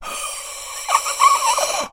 A low pitched guttural voice sound to be used in horror games, and of course zombie shooters. Useful for a making the army of the undead really scary.
indiegamedev, game, Voices, Speak, arcade, Voice, Evil, Lich, Vocal, Zombie, Monster, gamedeveloping, Ghoul, indiedev, videogame, games, Talk, gamedev